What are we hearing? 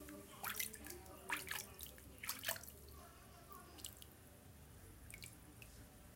es el sonido del agua moviendoce
agua, aguas, chorito, en, movimiento